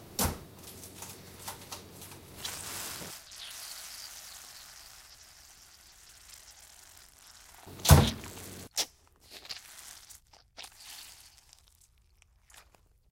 Stabbing, Cutting, and spurting artery
Stabbing, cutting, and spurting blood,
stabbing, cutting